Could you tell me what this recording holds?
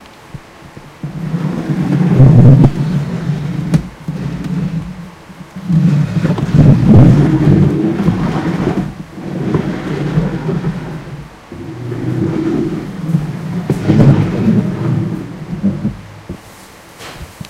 Recording of chair being scraped across hard wood floor. Recorded on Zoom H2.